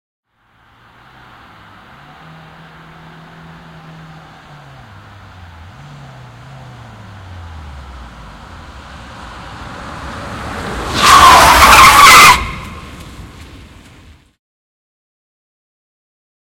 Passenger car braking on asphalt, tyres screeching // Henkilöauto, jarrutus asfaltilla, renkaat ulvovat
Nopea lähestyminen asfaltilla, jarrutus lähellä renkaat ulvoen.
Paikka/Place: Suomi / Finland / Lohja
Aika/Date: 1998